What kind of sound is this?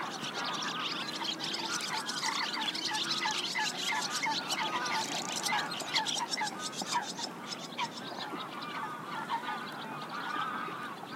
a group of swallows sings, other birds in backround. Sennheiser ME66 + AKG CK94 into Shure FP24, recorded with Edirol R09, M/S stereo decoded with Voxengo VST free plugin